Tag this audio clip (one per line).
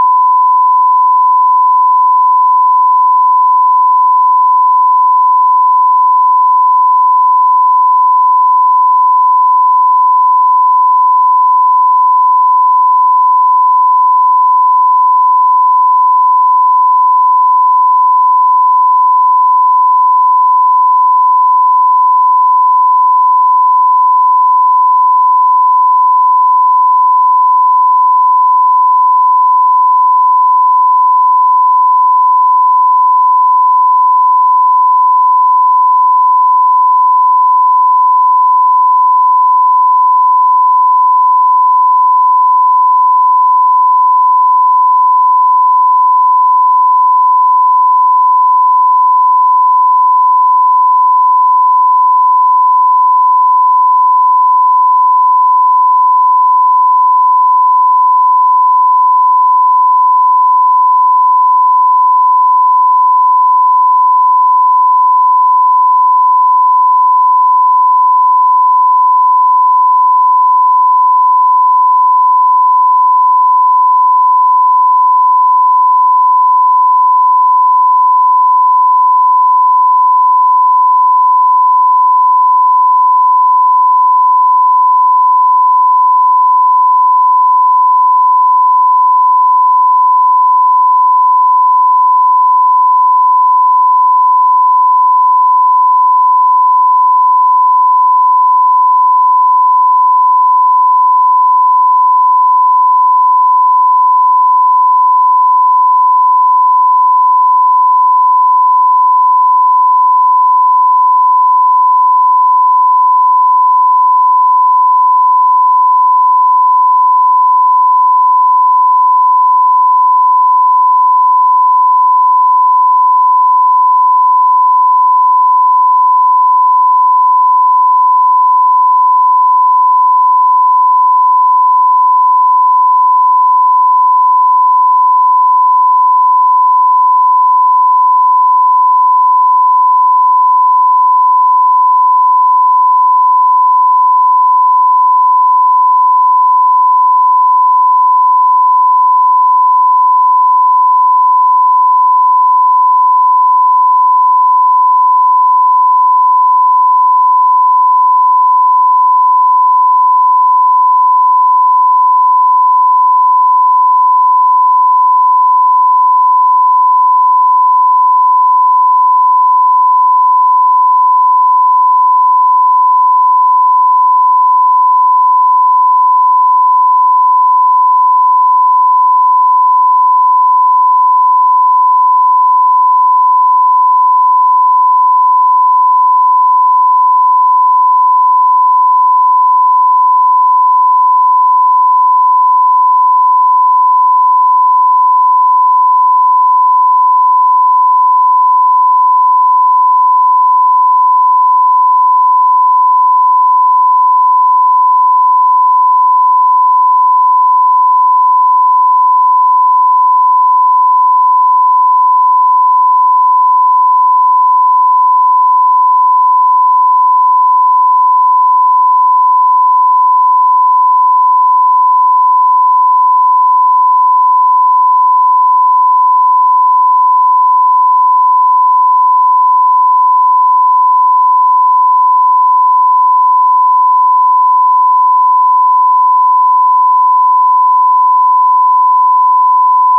sound
synthetic
electric